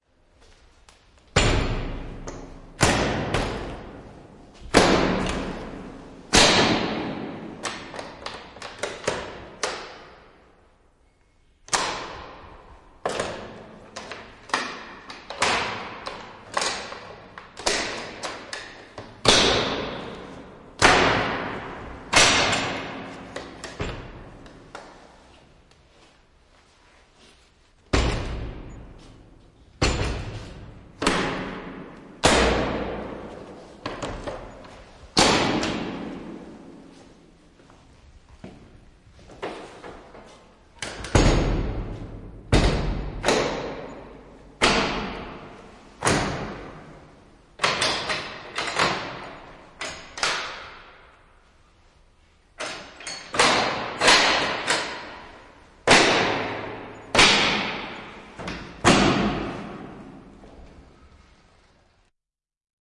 Putkan ovi, metallista lukkoa ja säppiä avataan ja suljetaan kaikuvassa käytävässä.
Paikka/Place: Suomi / Finland / Helsinki, Pengerkatu
Aika/Date: 14.04.1985